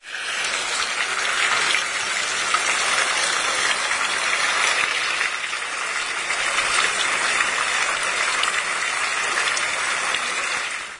26.08.09: The Neptun Fountain on the Old Market in Poznan/Poland.